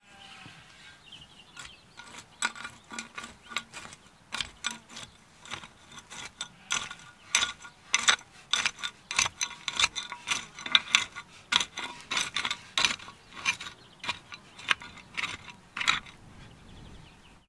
A dual mono field-recording of hoeing on a sandy loam (brown earth) containing small stones. Rode NTG-2 > FEL battery pre amp > Zoom H2 line-in.
field-recording, garden, gardening, hoe, hoeing, mono, soil, stones, weeding